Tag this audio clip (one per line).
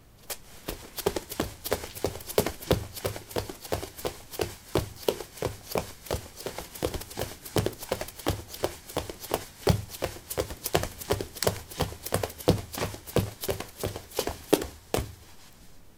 footstep
footsteps
run
running
step
steps